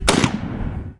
30-30 Lever Rifle Gunshot
Shooting with a 30-30 LR somewhere in Southern Colorado up in some mountainous terrain.
Any amount donated is greatly appreciated and words can't show how much I appreciate you. Thank you for reading.
๐Ÿ…ต๐Ÿ† ๐Ÿ…ด๐Ÿ…ด๐Ÿ†‚๐Ÿ…พ๐Ÿ†„๐Ÿ…ฝ๐Ÿ…ณ.๐Ÿ…พ๐Ÿ† ๐Ÿ…ถ